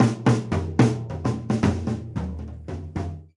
tom slowroll
a percussion sample from a recording session using Will Vinton's studio drum set.
percussion, roll, studio